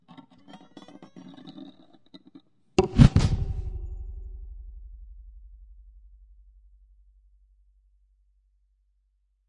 CR BowAndArrowStereo
Sequence - shooting arrow from a bow. Arrow flies from left to right